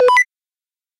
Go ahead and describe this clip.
Simple Computer Beep 1
Simple, Count, Computer, Robot, Beep, Arcade, Numbers, Counting, 1, Countdown